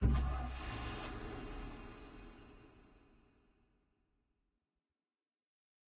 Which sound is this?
car start
The sound of a car engine starting from the interior of the vehicle
car-start, engine, vehicle